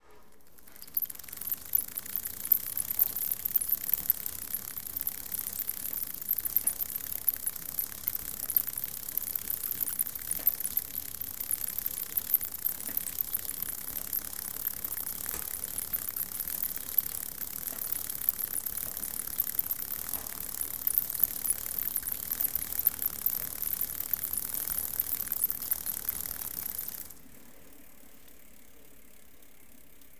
bicycle derailleur hanger
old bicycle "merida" recorded at home, arm-pedaling
click, whirr